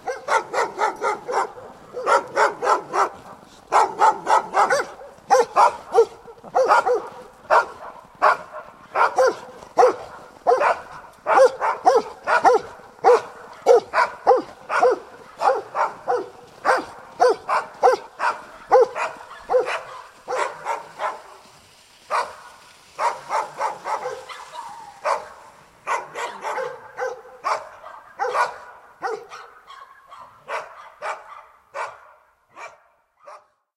Dogs barking 3
angry, animal, bark, barking, dark, dog, dogs, growl, growling, hound, labrador, mongrel, night, pet, pitbull, rottweiler, terrier